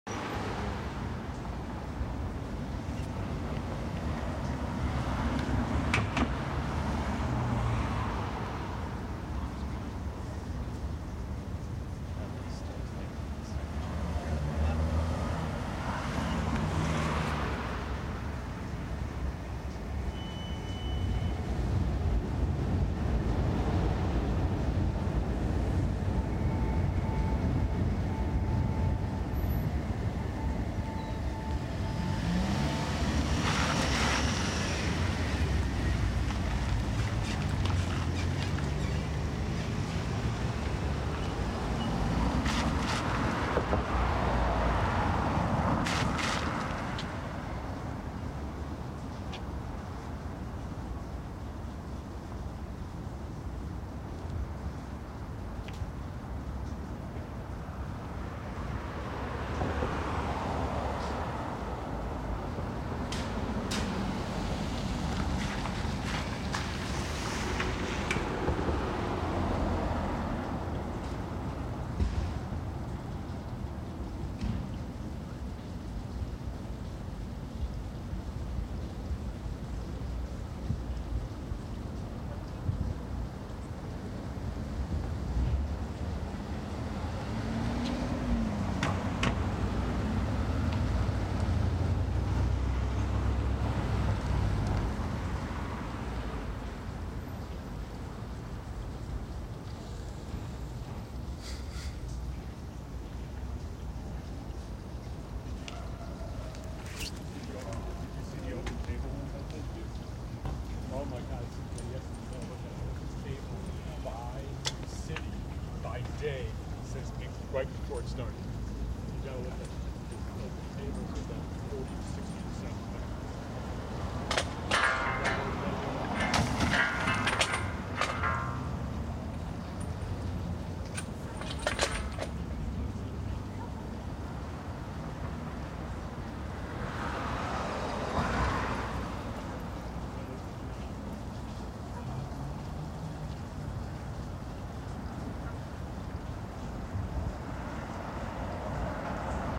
Upper East Side Intersection
Shot a timelapse at this location and captured this ambient sound with my phone to add some depth to my timelapse.